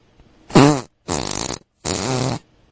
wun tew thraaay fart
flatulation, flatulence, gas, noise, poot